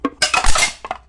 empty soda can being bent in half
clang, metal, metallic